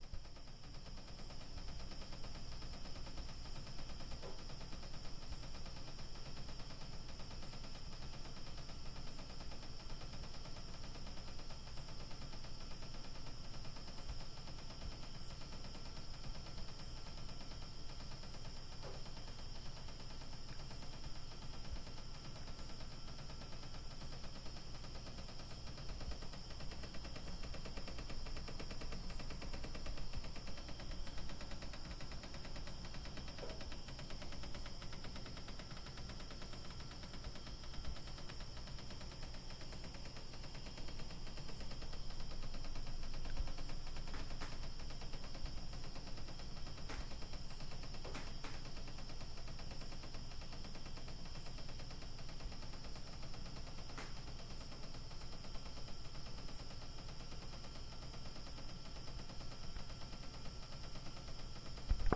shaking obj 2
objects on microwave gently shaking, tascam recording
shaking, tapping-sound